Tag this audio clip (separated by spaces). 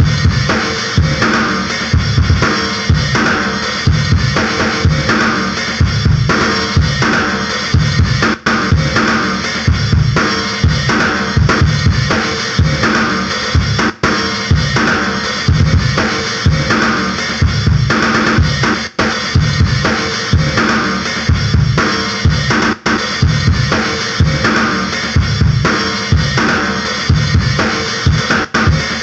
bigbeat drum-loop drumloop drumloops loop